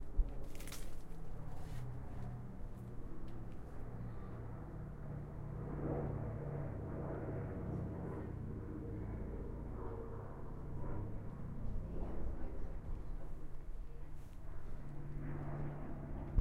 a very brief encounter with a B17 Flying Fortress 'Sally B' as she flew over Belfast on the 14 Sept. 2013. General background noises may include dogs barking, children playing in the distance, passers by and a garden strimmer being used nearby.
strimmer, Belfast, Sally-B, Aircraft, zoom-H1, field-recording, Flypast, Northern-Ireland, B17, Flying-Fortress